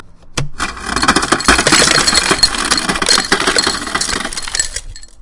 Ice into a glass
Ice being dispensed by a fridge into a tall glass tumbler